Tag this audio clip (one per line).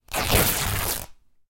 breaking
bursting
cloth
drapery
fabric
ripping
rupturing
tearing